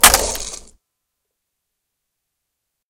instant chill 2
this sound can be used for freeze scenes or things like that, you know. made with audacity by joining some sounds recorded with my cell phone and other sounds of crunchy wood as it sounds like crushed ice